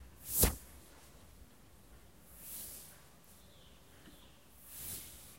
sonido de cortina
sonido de toque de mano en una cortina.
se va tocando lentamente la cortina mientras el microfono esta super cerca para captar hasta el mas minino segundo
cortina, de, sonido